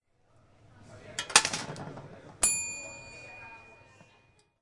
new tip
This sound was recorded in the UPF's bar. It was recorded using a Zoom H2 portable recorder, placing the recorder next to a costumer who put a tip on the tip tin.
The can hear the sound of the coin and the posterior ding-dong.
bar, campus-upf, ding-dong, tip, UPF-CS13